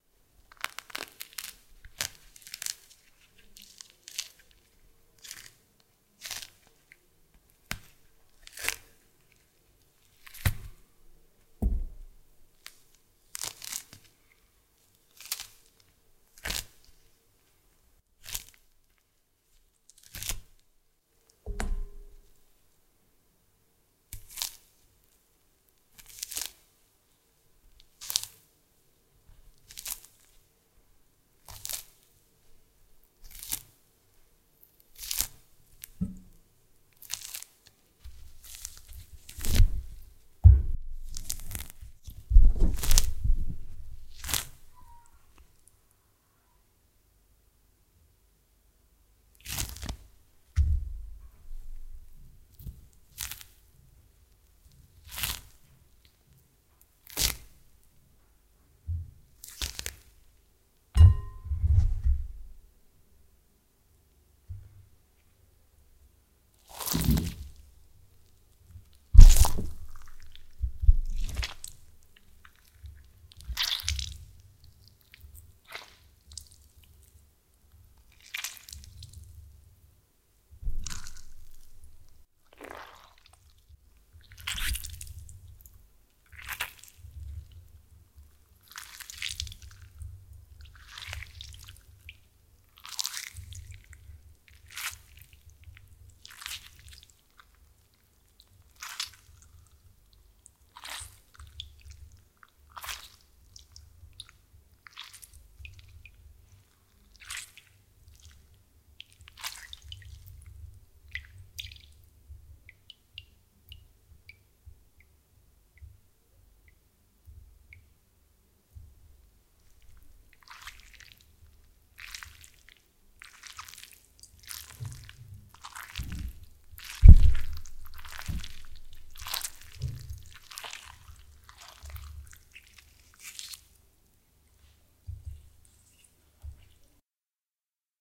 Peel and crush the orange
Peeling and squeeze the orange.
Recorded at Steinberg UR12 + Behringer C-3.
orange, chunk, squeeze, crushing, squash, Peeling